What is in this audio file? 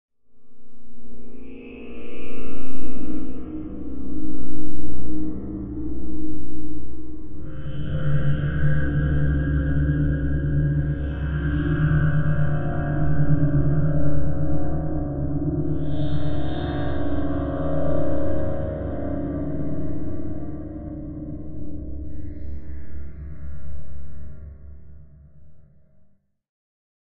A strange spacy sci-fi sort of sound - part of my Strange and Sci-fi 2 pack which aims to provide sounds for use as backgrounds to music, film, animation, or even games.